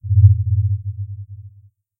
Strange vibrations.
Imagine that these vibrations could be from an underwater world, or from outer space. Or maybe you're one of a few chosen ones that has recently visited a newly discovered, strange planet and decided to swim in the ocean (if there's any) and suddenly heard/felt these vibrations from underwater?
If you enjoyed the sound, please STAR, COMMENT, SPREAD THE WORD!🗣 It really helps!
/MATRIXXX
creature, science-fiction, sea, undersea, vibrations